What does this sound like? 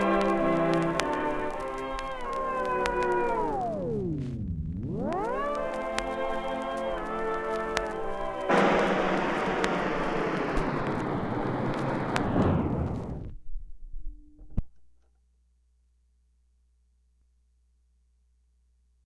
adjusting the spped of a record
a record slowly coming to stop
record, pitchshift